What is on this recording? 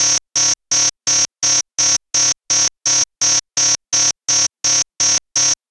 Some oscillators get angry with the sequencer. Wake up!
annoying, harsh, alarm, digital